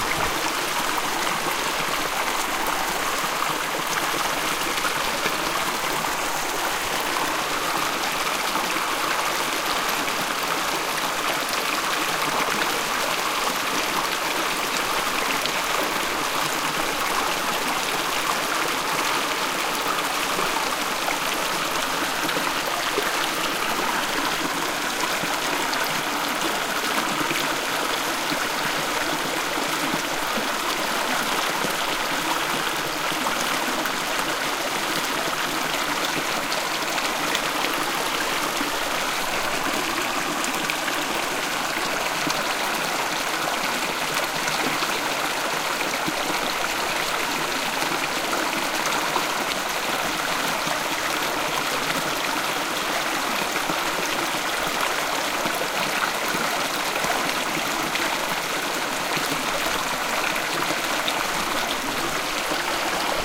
Water Gush Under Bridge
under, bridge, gush, water